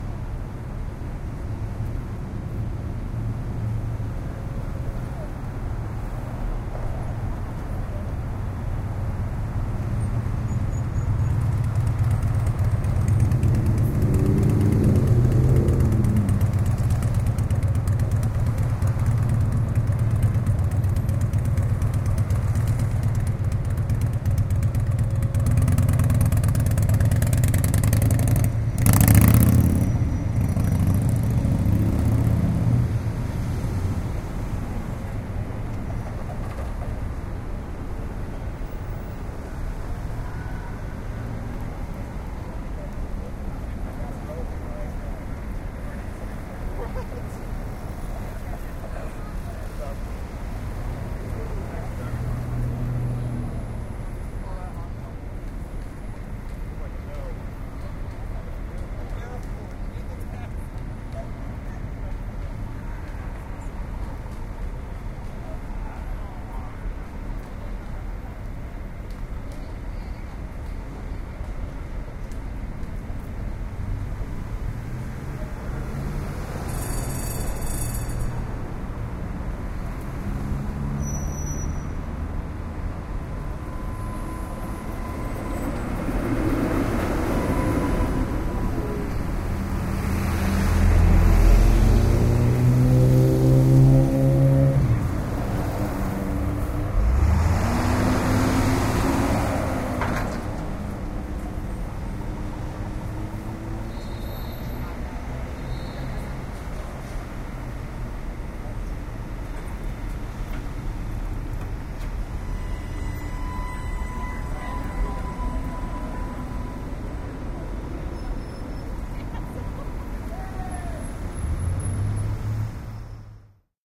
Standing on the central median of Canal Street in New Orleans, LA, 03-18-2011.
Traffic can be heard stopping and starting at the intersection, including the sound of a loud motorcycle.
The second prominent feature of the recording is a the sound of an approaching streetcar typical of New Orleans. The sound of the streetcar's engine, the rails, and a rapid warning bell can all be heard as the vehicle approaches.
At points in the recording various voices can also be heard.
horn, street, traffic